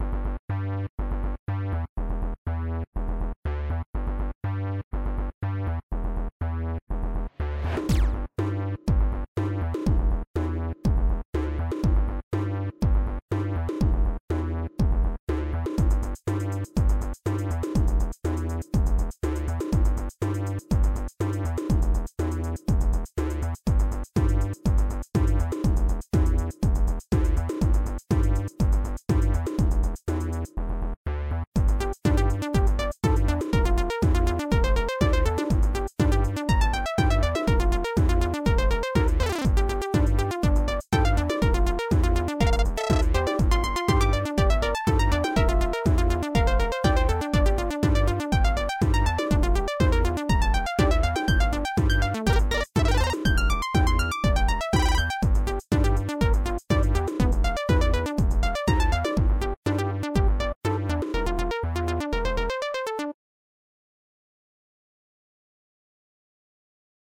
A music I made for a dance I did.